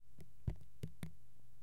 Water On Paper 17
Drops on paper.